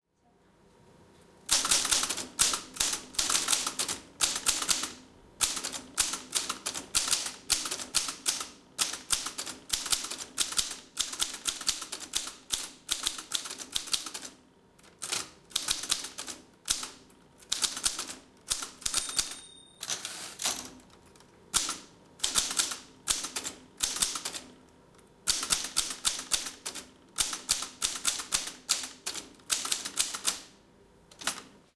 and old mechanical typewriter, recorded with a sony nex vg-20
video camera, medium speed typing
bell, field-recording, old, typewriter